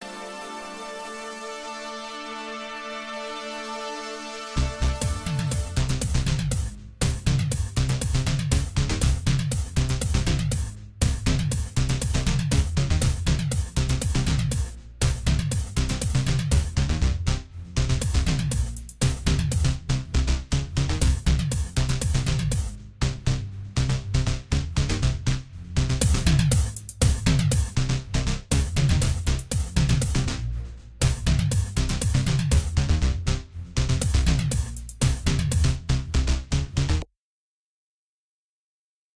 Dance Kit Sample
Dance hall music